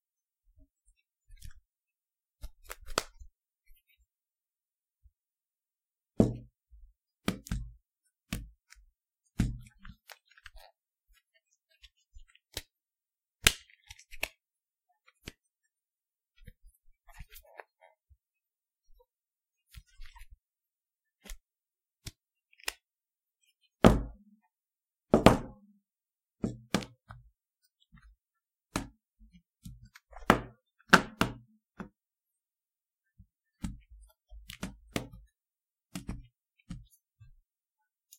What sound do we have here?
Bouger truc - denoisé
Playing with my phone to imitate the sound of someone playing or analyzing a thing.
Recorded with a Zoom H2N - denoised with audacity